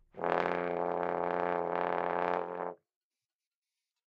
One-shot from Versilian Studios Chamber Orchestra 2: Community Edition sampling project.
Instrument family: Brass
Instrument: OldTrombone
Articulation: buzz
Note: F1
Midi note: 30
Room type: Band Rehearsal Space
Microphone: 2x SM-57 spaced pair
midi-note-30, vsco-2, single-note, multisample, oldtrombone, buzz, brass, f1